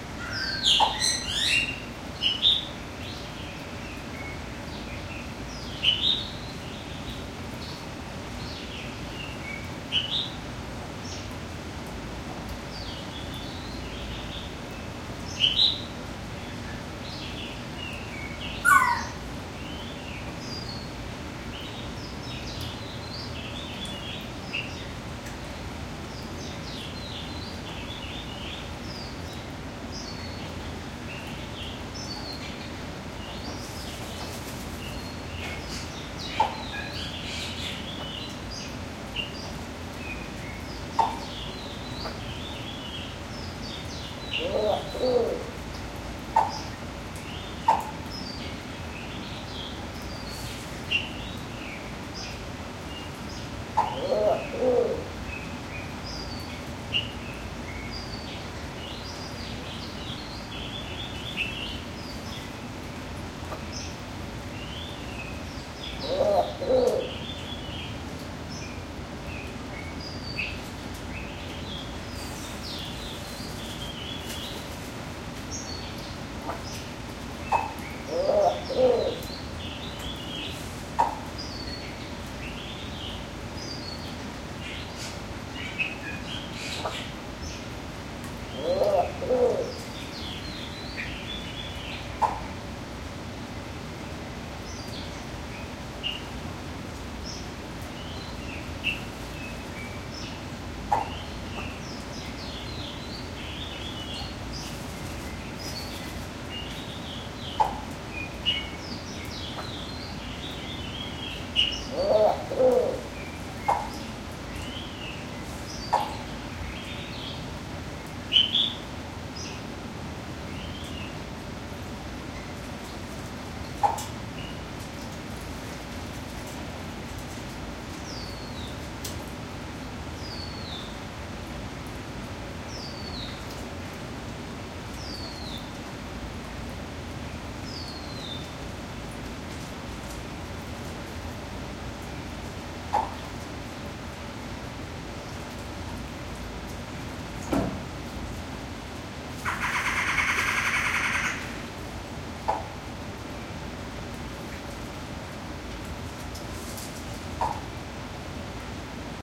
bird building02
Recorded at the Dallas Zoo, inside the Bird and Reptile Building. Calls from several bird species, including Superb Starling, Bali Starling, Collared Finchbill, Wompoo Fruit-dove, Red-bellied Woodpecker and an unknown bird. Also some movement from birds. The Superb Starling is the distant songbird throughout the recording, and it only sounds distant because it was behind glass.